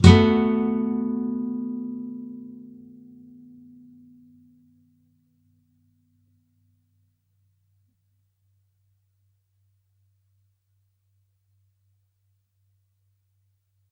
B7th thin strs
Standard open B 7th chord but the only strings played are the E (1st), B (2nd), and G (3rd). Up strum. If any of these samples have any errors or faults, please tell me.
7th, acoustic, chords, clean, guitar, nylon-guitar, open-chords